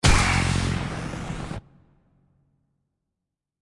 Sci-Fi Heavy gunshot
weapon gunshot weapon sci-fi future
future, gunshot, sci-fi, weapon